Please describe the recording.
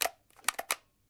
Whisk Reload 05
Clicking a whisk button to emulate a handgun reload sound.
gun, magazine, clip, weapon, whisk, handgun, reload